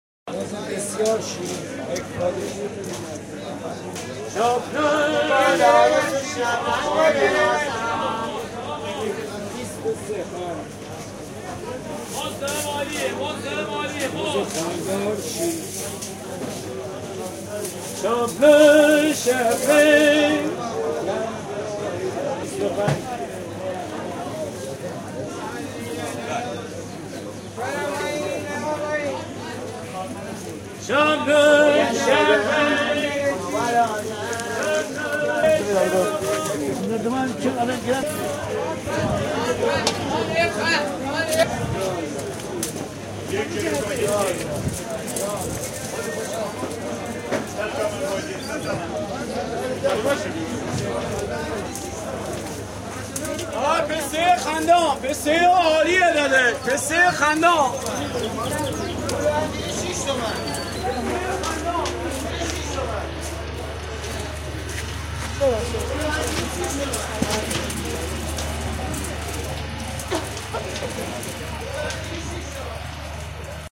This sound recorded by me in Rasht Central Bazaar, some of the sellers from this bazaar really have great talent in singing :)